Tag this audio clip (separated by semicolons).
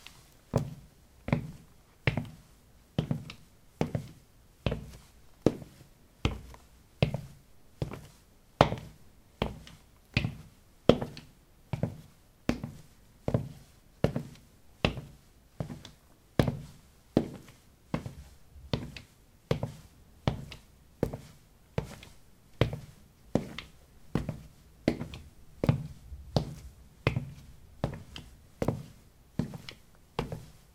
steps; footstep; footsteps